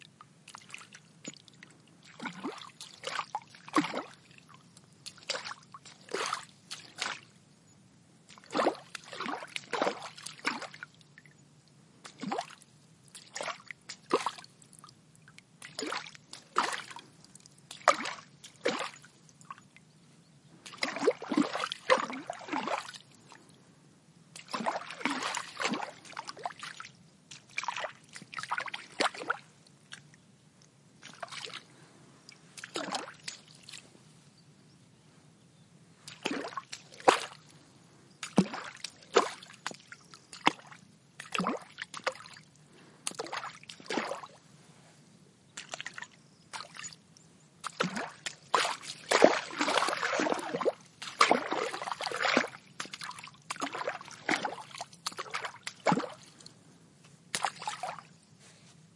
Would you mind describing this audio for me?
noise made paddling with a stick on a shallow swamp / agitando el agua con un palo en una charca somera